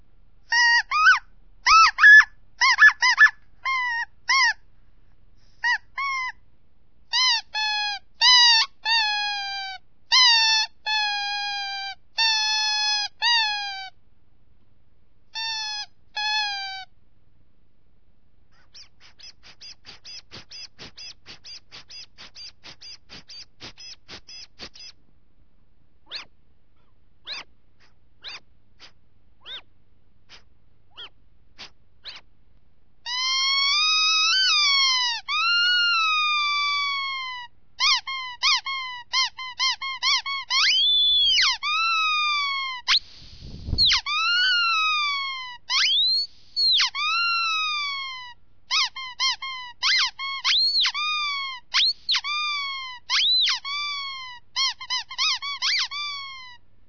Mono recording of four different rubber animals with whistle and little pump.
clown, plastic, rubber-animals, toys, trumpet, whistle